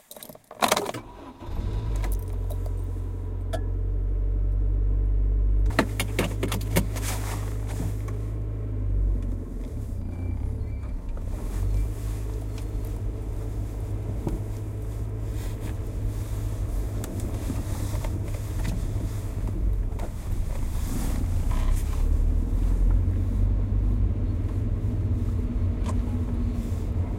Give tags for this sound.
1999; Aerodeck; auto; automobile; car; Civic; drive; driving; engine; Honda; HQ; motor; race; racing; start; start-engine; vehicle

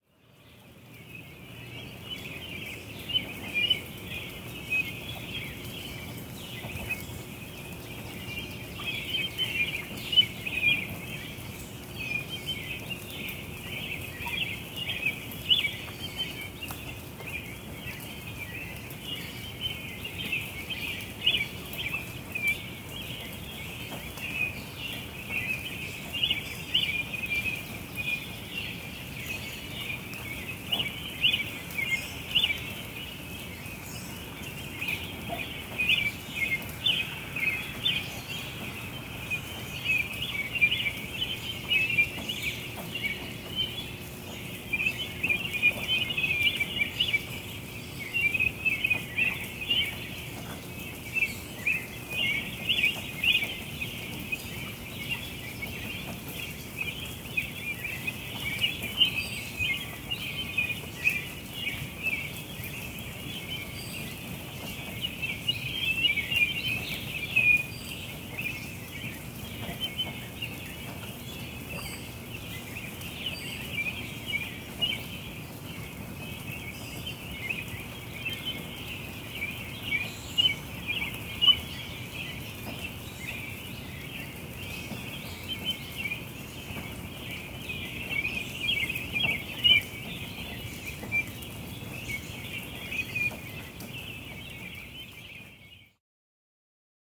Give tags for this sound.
Birds; Birdsong; Maryland

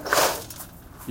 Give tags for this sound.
rocks; footstep; crunch

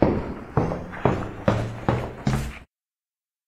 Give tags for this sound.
concrete; feet; steps; step; foot; running; walking; footstep; footsteps

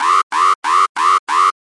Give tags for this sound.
futuristic; alarm; gui

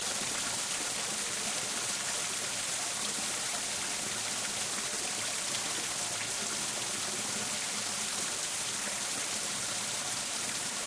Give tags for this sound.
cave; caving; river; water-falls

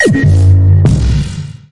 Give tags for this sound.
fighter; laser; missile; scifi; space; space-echo; spaceship

